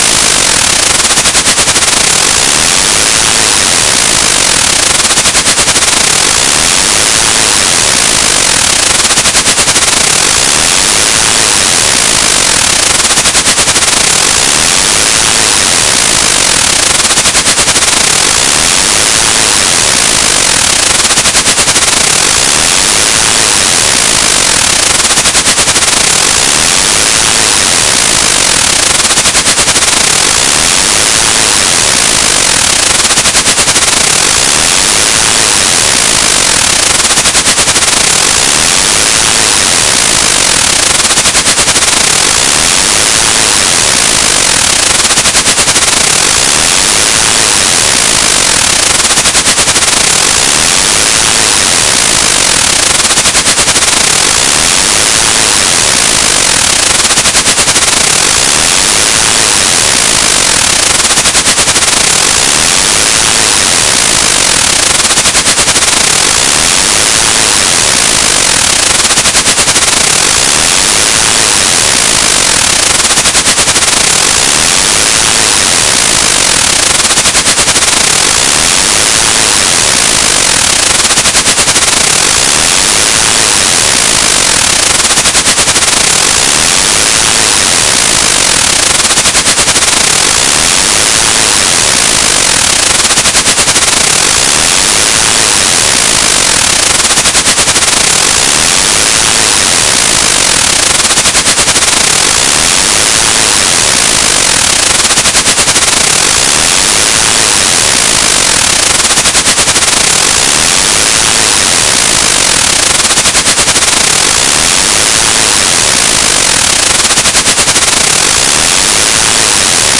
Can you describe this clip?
0/1 Random function amplitude modulated by a frequency modulated sine wave between 27-55 HZ a sine distributed linearly.
bursts, effect, machine, modulated, random, sound, space, static